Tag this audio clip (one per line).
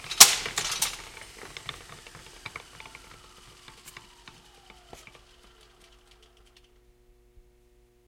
metal
off
switch
machine
clack